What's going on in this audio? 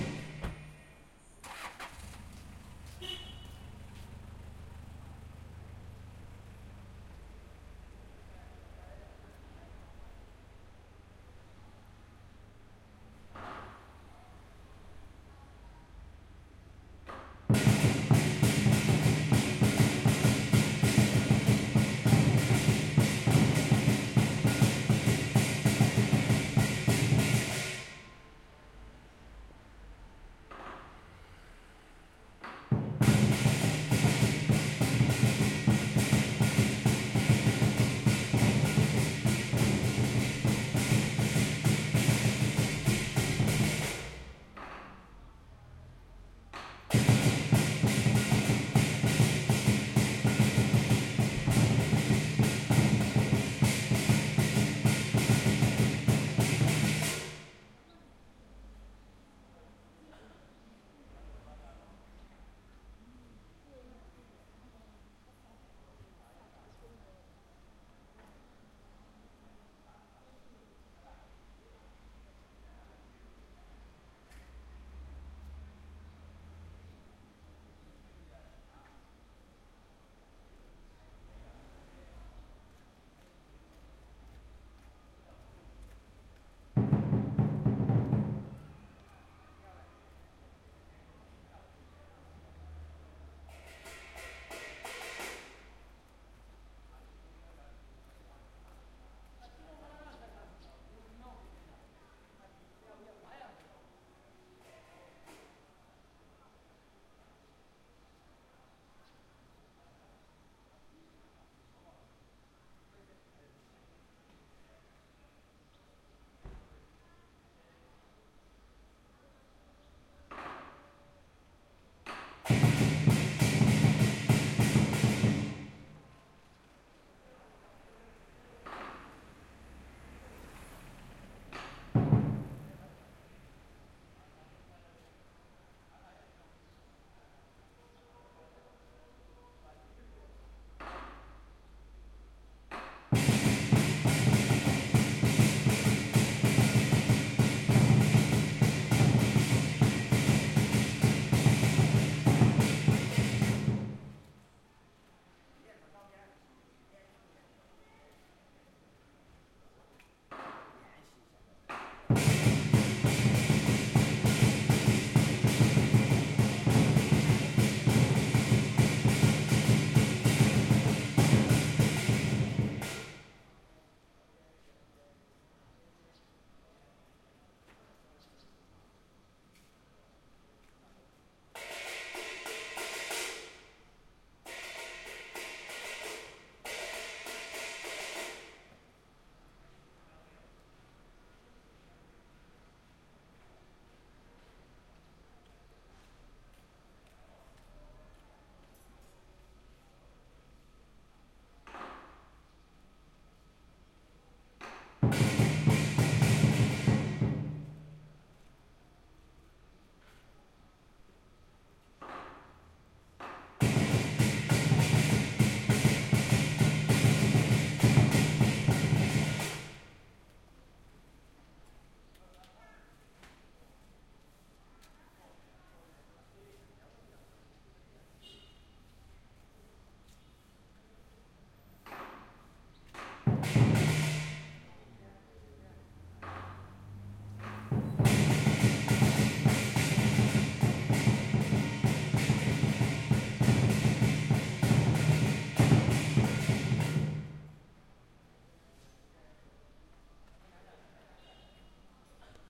Drumming practise
Drummers practicing on the street at night.